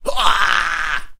Human Good 07
A clean human voice sound effect useful for all kind of characters in all kind of games.